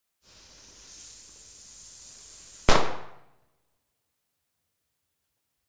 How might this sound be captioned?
Fuse and small Explosion
A fuse with a small explosion done with some gunpowder.
Bang, Bomb, fireworks, Explosion, Gunshot, gunpowder